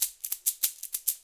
SmShaker (96.5 bpm ptn)
spaces, separating, J, st, tags, them, 3